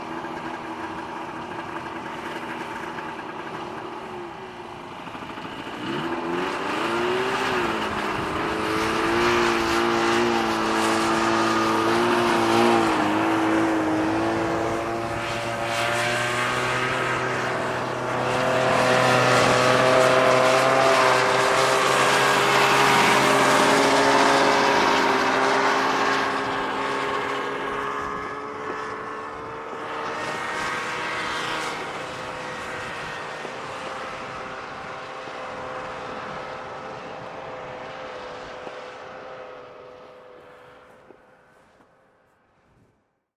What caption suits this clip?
snowmobiles group pull away2
snowmobiles group pull away